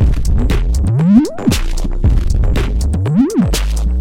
mushroom disco
loop, breakbeat, distortion